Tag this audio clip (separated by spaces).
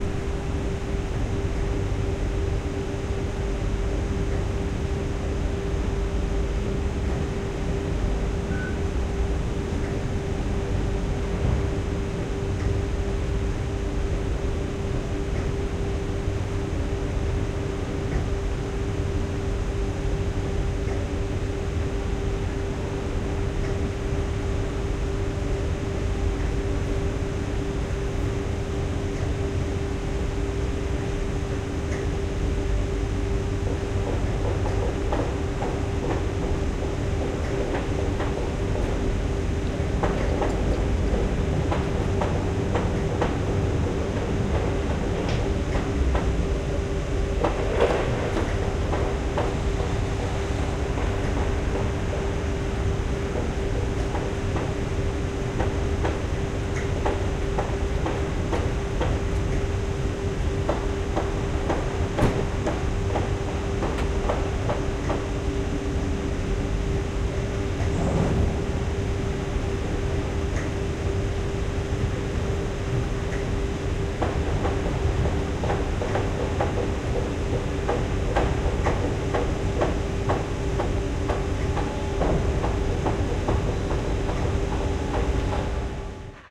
wind field-recording machine hammer ambience